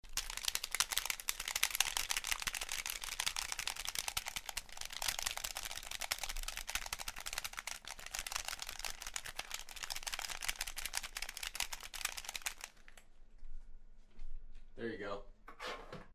A quick recording of me mashing buttons on an Xbox360 controller.
xbox-controller, game, Rode, sound, machine, home-recording, gamer, controller, appliance, gaming, nt1-a, button-mashing, xbox